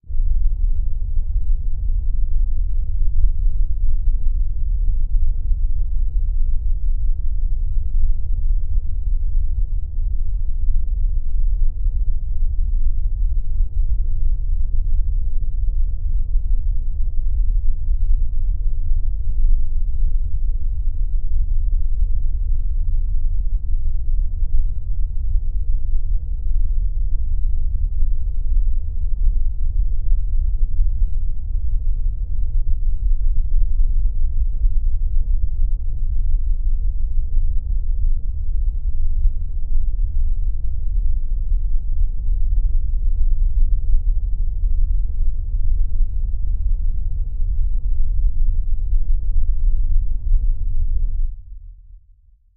Cyber noise 03
Synthetic noise. Background noise.